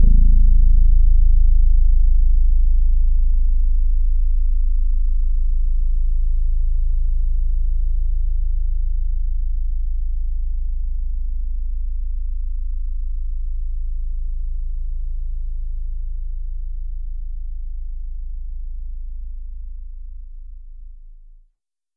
ACOUSTIC BASS A0 27,5Hz
This sound is the result of analysis and re-synthesis of an acoustic bass note. The original recording was decomposed in sound grains by an atomic decomposition algorythm (matching-pursuit). The synthesis, made from the parameters obtained by the atomic decomposition process, was performed by the SOM-G language interpreter. SOM-G is a language dedicated to granular synthesis that makes it easy to synthesize sound from a sequence of Gabor atoms parametrized one by one.
bass, grain, granular, synth, synthesis